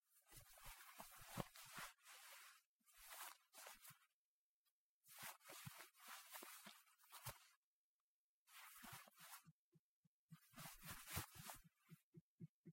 Foley cloth movement. AKG 460 with CK-1 capsule into Neve 1272 preamp, Apogee mini-me converter. Edited in Samplitude.
cloth, foley